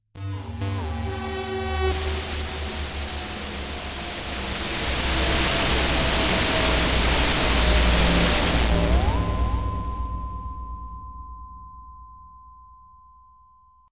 A complex sequence of effects.